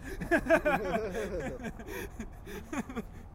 Laugh-Porto-19 07 2009
People laughing during a walk in Porto. Recorded with an Edirol R-09HR.
porto, people, voice, smc2009, laugh